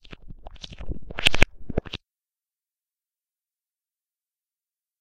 belt sound revesed with phaser effect